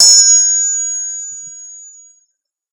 a sound of a metal object striking the glaze, heavily reverberated
metal, reverb